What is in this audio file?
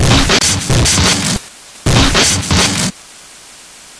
Cyberian Flowerbreak oo3
Several breakbeats I made using sliced samples of Cyberia's breaks. Mostly cut&paste in Audacity, so I'm not sure of the bpm, but I normally ignore that anyways... Processed with overdrive, chebyshev, and various other distortionate effects, and compressed. I'm somewhat new to making drum breaks, I'm used to making loops, so tell me how I'm doing!
drum, drumbreak, breakbeat, hardcore, loop